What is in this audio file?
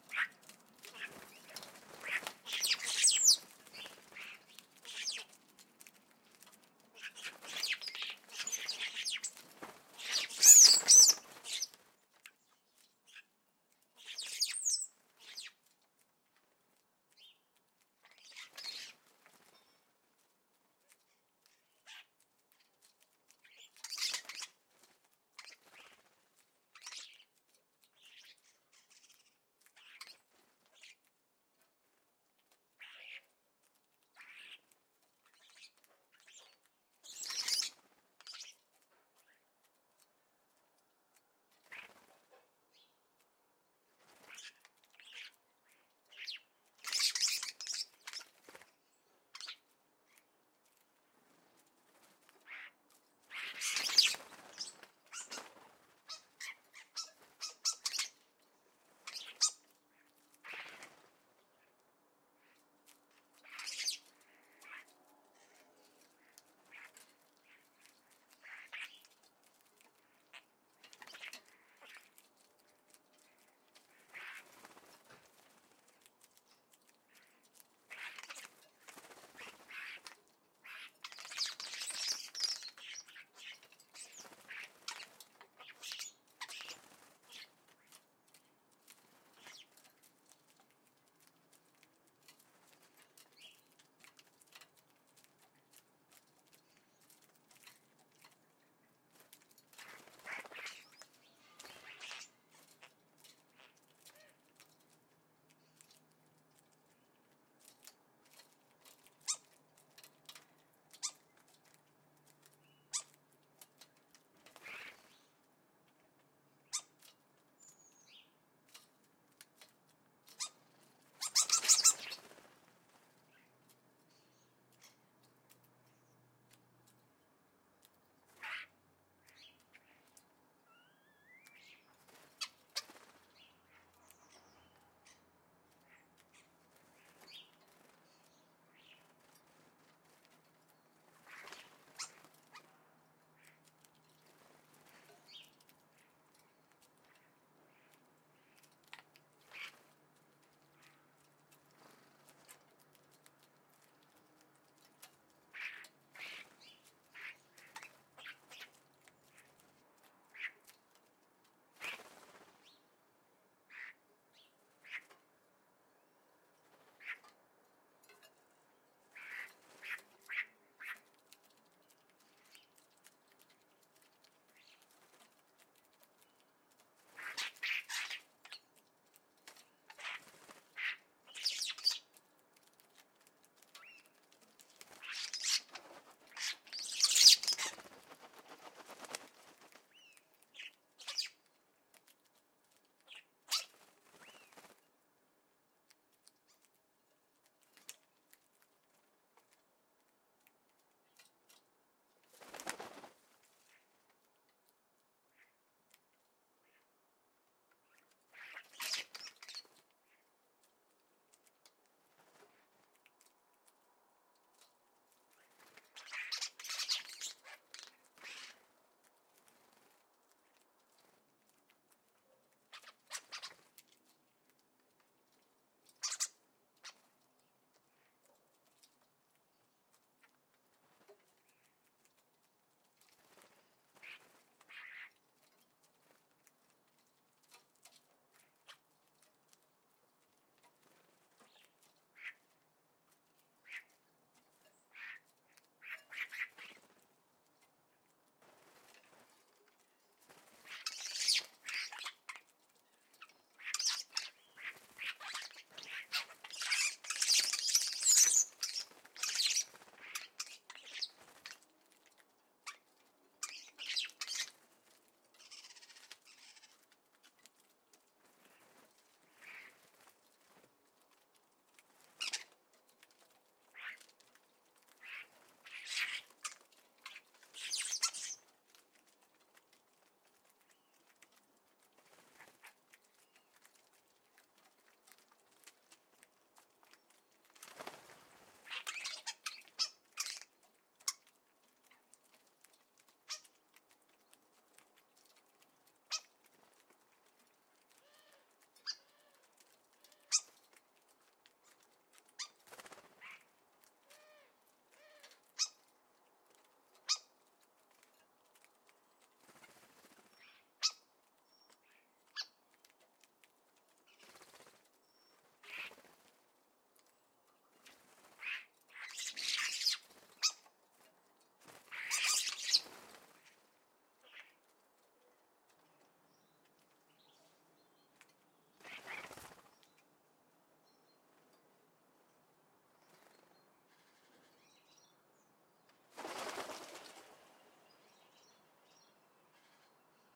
Birds at Feeder
Birds recorded coming to a feeder in my garden. The main sounds come from starlings (Sturnus vulgaris); collared doves (Streptopelia decaocto) can be heard a couple of times. The wing sounds of woodpigeon (Columba palumbus), great tit (Parus major) and house sparrow (Passer domesticus) can also be heard.
Recorded with a Sennheiser K6/ME66 mic on a stand, attached to a Zoom H5 via a long lead. Edited with Audacity. No noise reduction or other enhancement.
birds, birds-at-feeder, field-recording, nature, starlings